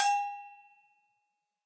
Agogo Bell Low Velocity11
This pack is a set of samples of a pair of low and high and pitched latin Agogo bell auxilliary percussion instruments. Each bell has been sampled in 20 different volumes progressing from soft to loud. Enjoy!
bells, cha-cha, hit